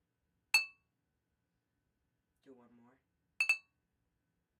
bottle clink
two bottles hit against each other twice. great for a "cheers" sound effect!
cheers, foley